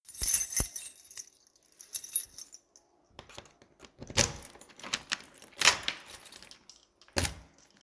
door unlock
Unlocking a door: jingling of keys, large keyhole.
Recorded with a Samsung S8 in a apartment.
door, jingling, keys, clink, unlock